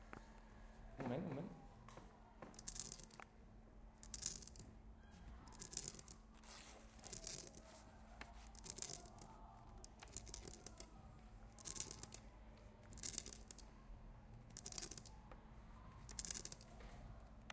mySound SASP 09
Sounds from objects that are beloved to the participant pupils at the Santa Anna school, Barcelona.
The source of the sounds has to be guessed, enjoy.
cityrings, santa-anna, spain